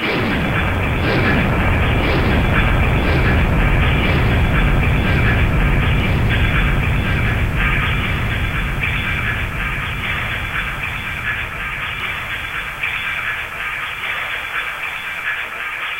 Processed sound from virtual avalanche demo mixed with piledriver sounds recorded with my cell phone... this might not be the best but I am recording with a cellphone so definitely need help, please pick this.
earth, contest